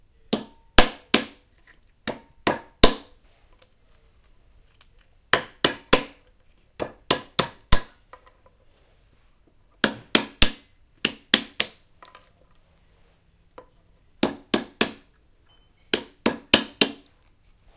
Breaking walnuts with a hammer
This is the sound of breaking walnuts on a piece of wood using a hammer.